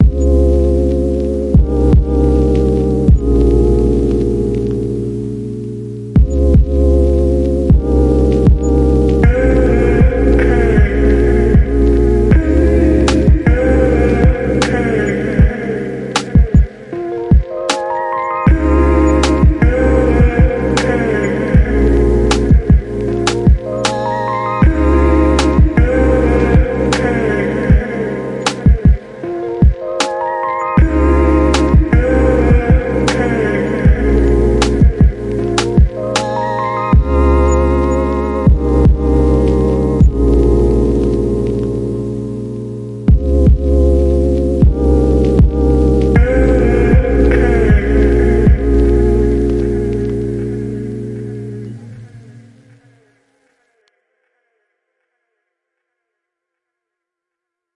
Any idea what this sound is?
Crossed Path, a lo-fi instrumental hip hop track
Crossed Path is a soothing lo-fi instrumental hip-hop track designed to transport you from the oppressive heat and deafening noise of the urban jungle to a tranquil escape. Imagine leaving behind the humid city streets for a serene beach where sand cushions your feet, fresh air rejuvenates your senses, and laughter fills the air.
This mellow track blends smooth beats and atmospheric sounds, making it ideal for:
Background music for videos
Study and relaxation playlists
Dreamy creative projects
Chill urban or nature-themed content
Let Crossed Path carry you to a carefree moment of joy and relaxation, where the stresses of city life melt away.
Thank you for listening.
APPLY THE FOLLOWING CREDIT IF THIS TRACK IS USED IN YOUR PRODUCTION:
📜 USAGE RIGHTS AND LIMITATIONS:
🎹 ABOUT THE ARTIST:
Creatively influenced by the likes of Vangelis, Jean Michel Jarre, KOTO, Laserdance, and Røyksopp, Tangerine Dream and Kraftwerk to name a few.
vocal, groove, wobble, dreamy, tape, grungy, warble, loopable, podcast, retro, downtempo, intro, crackle, beat, loop, vintage, hiphop, vinyl, lofi, Lo-fi, soul, RnB, spatial, music, video